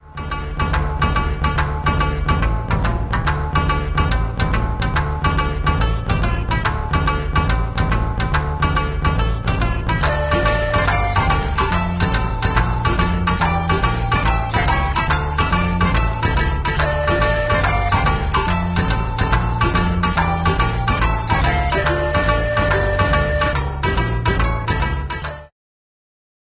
Virtual Koto, Shamisen and Shakuhachi VST by ShamiKoto (Festa In Autumn, Folk Okinawa)
Audio Sample: 'Festa In Autumn' (Folk Okinawa) testing Virtual Koto, Shamisen and Shakuhachi VST presets with ShamiKoto and DAL Flute